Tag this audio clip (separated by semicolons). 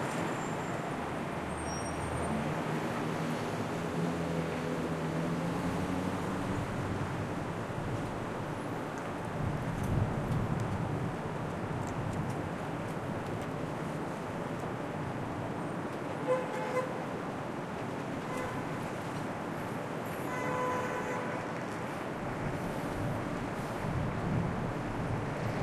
ambience; ambient; brakes; busy; car; cars; city; field-recording; horn; New-York; noise; noisy; NY; street; sweeper; traffic